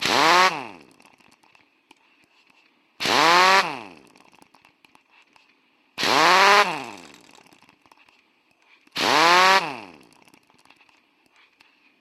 Impact wrench - Chicago Pneumatic model A - Start 4
Chicago Pneumatic model A impact wrench started four times in the air.